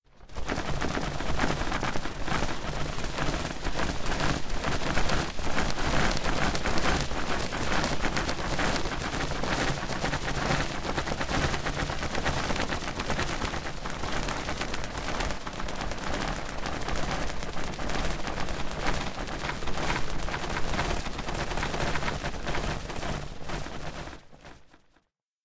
You are flying away in a little helicopter! I used this for the end of a little game I made:
flutter, whirl, whirly-bird, propeller, fan, helicopter, pinwheel, wind-mill, whirr, beating, flapping, spin